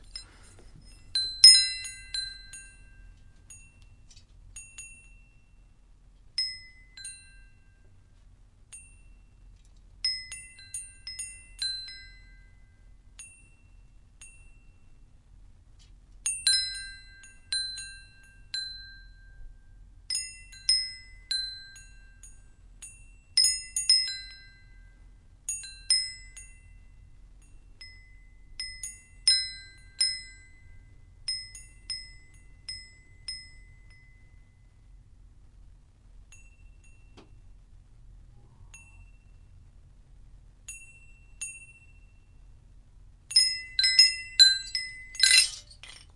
FOLEY Windchime
What It Is:
A windchime... chiming.
A windchime.
Recorded with an iPhone.
AudioDramaHub, bells, chime, chimes, foley, wind, wind-chimes, windchimes